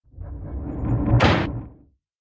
Sliding Door Slam And Rebound
door, runners, sliding-door, request